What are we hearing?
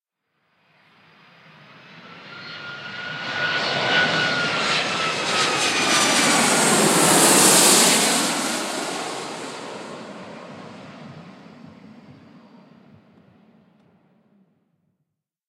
Plane Landing 08
Recorded at Birmingham Airport on a very windy day.
Birmingham, Plane